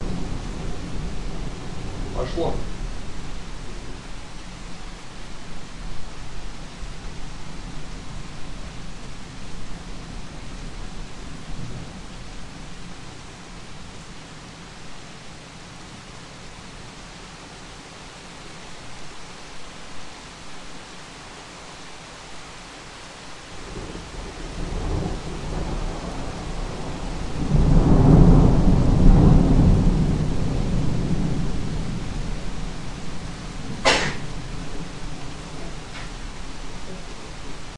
Rain and thunder.